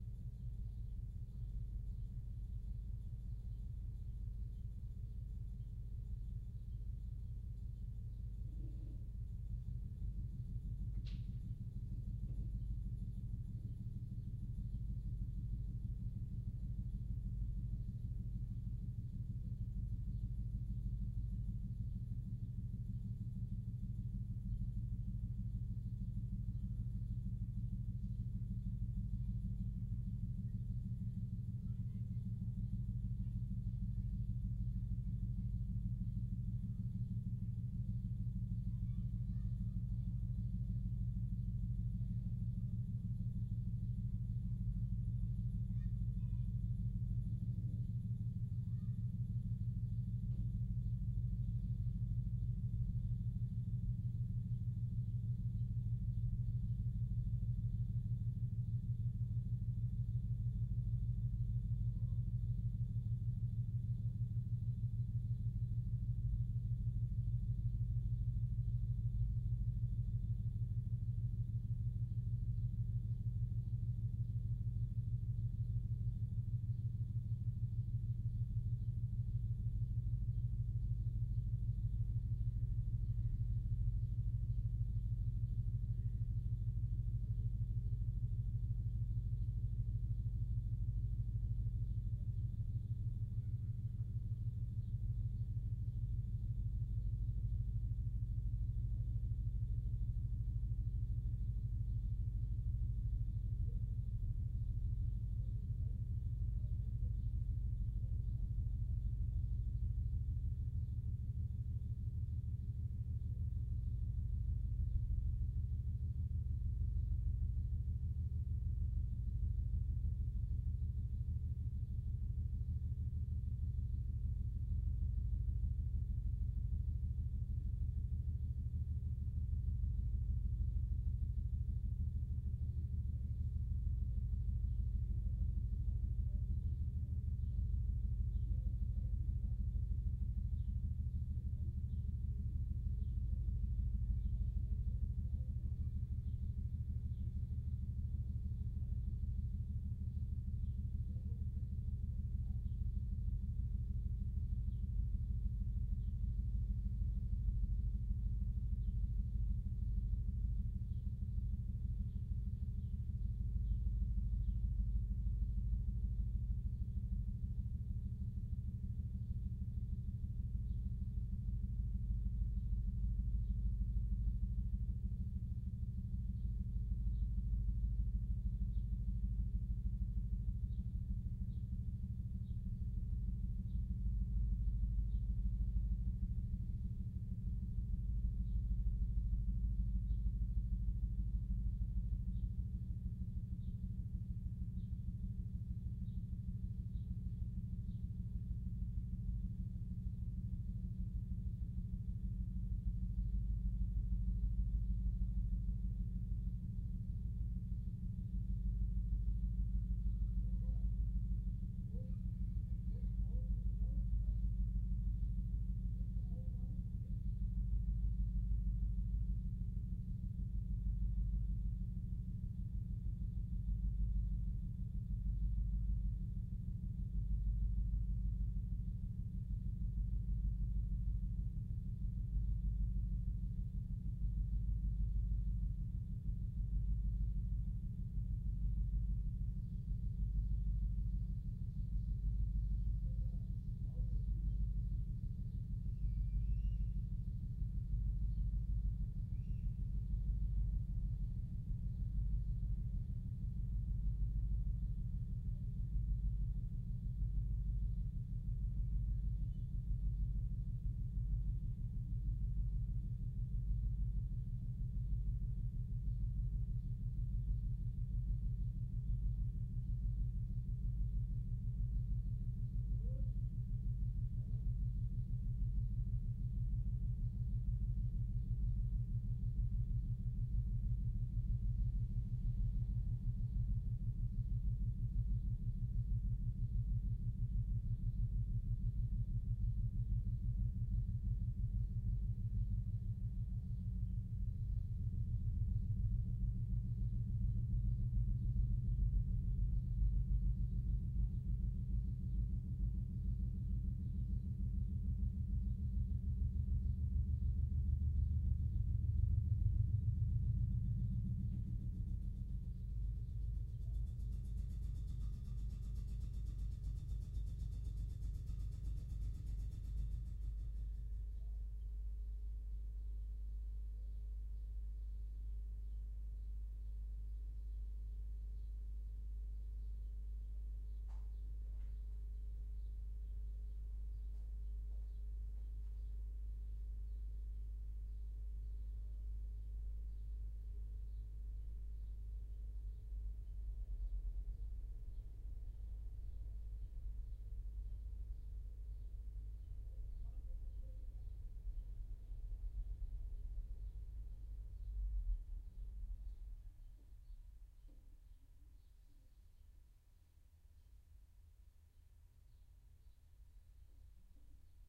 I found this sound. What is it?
BG SaSc Low Bass Pulse Construction Work 01 Jackhammer Distant
Low Bass Pulse Construction Work 01 Jackhammer Distant
Low, Construction, Bass, 01, Work, Jackhammer, Pulse, Distant